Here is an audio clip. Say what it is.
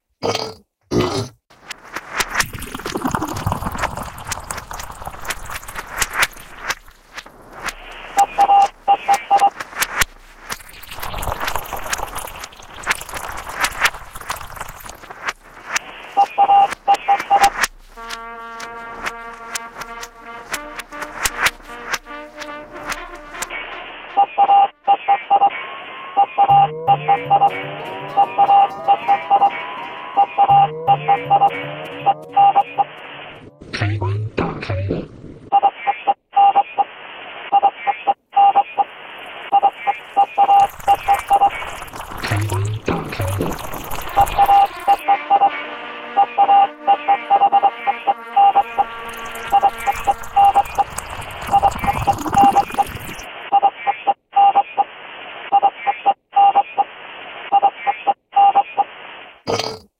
ASPM - A10 Part 1
synthesis, sampling, analysis, transformations